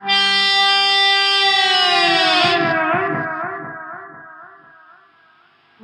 Guitar swell 2
Guitar swell made with a Tokai Strat and using a pod xt. Delay.
90bpm, A, Guitar, Key, Noise, Pod, Strat, Swell, xt